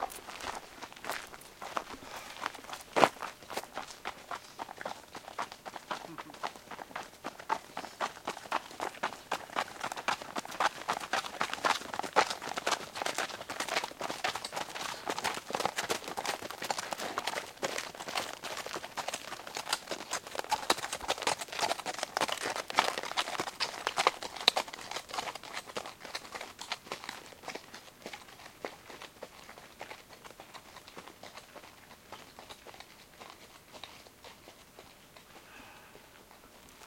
Horses walking on a gravel road in the PaloAlto hills.
Hooves, Horses, Hoofbeats